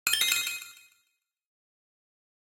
Retro Game Sounds SFX 92
fx, gamesound, pickup, sfx, shoot, sound-design, sounddesign, soundeffect